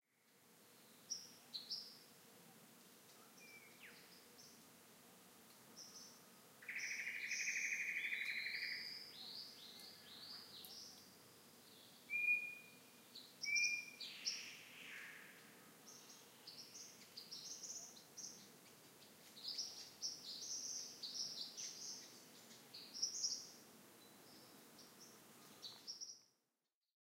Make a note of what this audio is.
Daintree Rainforrest Birds 4
Some binaural recordings of the birds in the Daintree rain-forrest area of Queensland Australia.
tropical, birds, daintree, field-recording, binaural